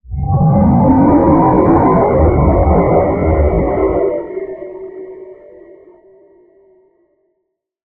noise monster 6
I created this sound with my mouth, then I highly retouched WavePad Sound editor on AVS audio editor and then at the end of 7.1 and Adobe Audition cs6.
creepy, monster, noise